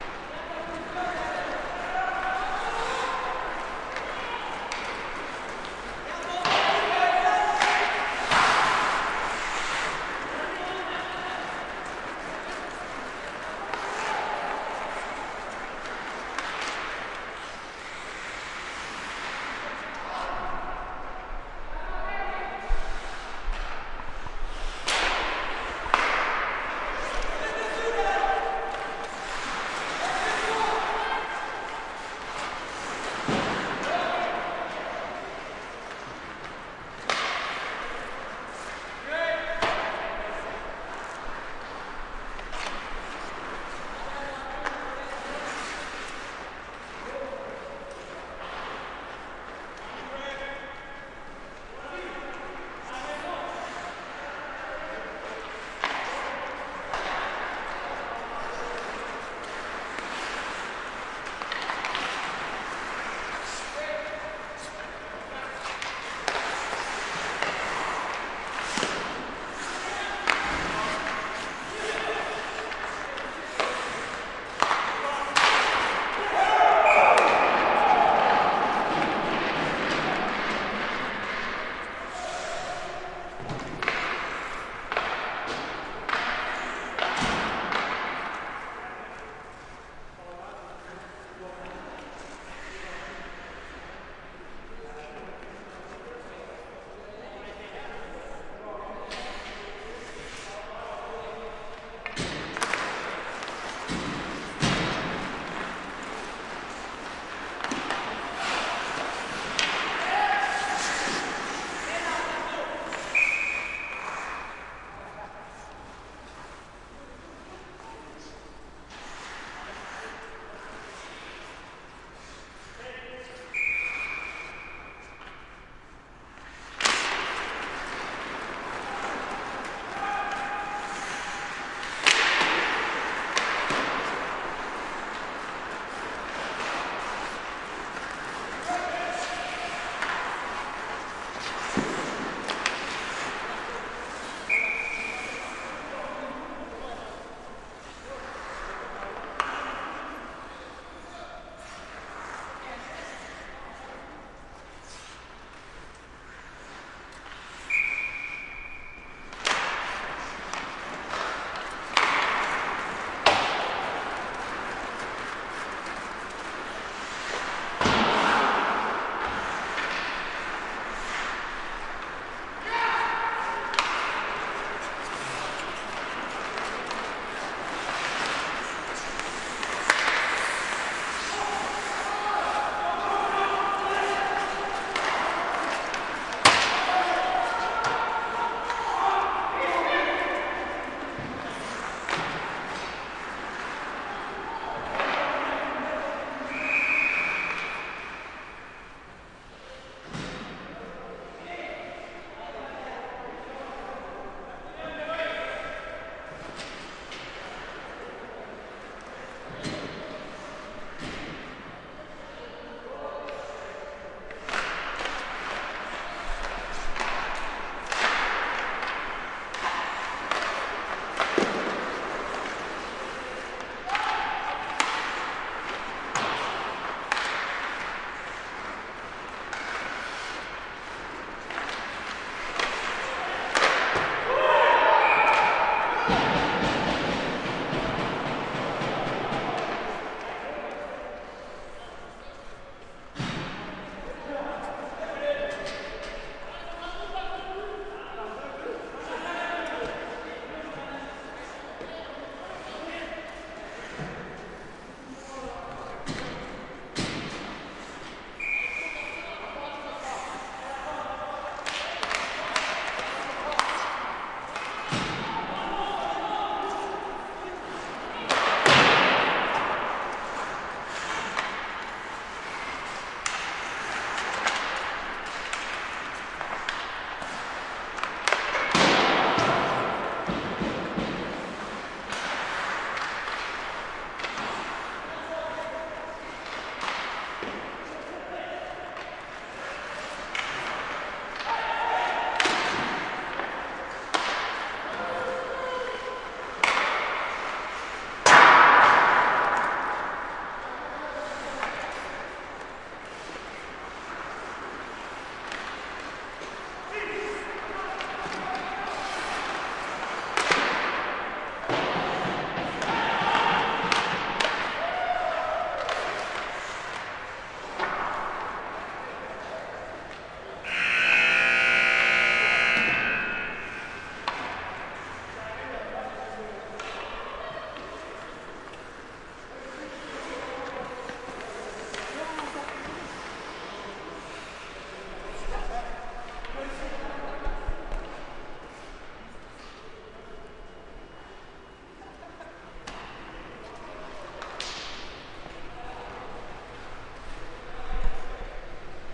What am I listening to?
Ice hockey game, amateur, no audience, indoors arena. I don't even like hockey :(
sports, arena, ice, hockey, game